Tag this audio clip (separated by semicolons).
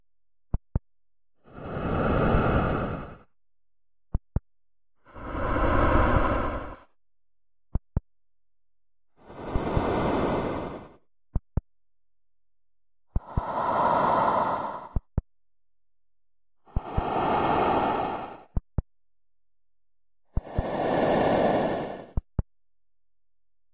Scuba-Diving; Deep-Water; Breathing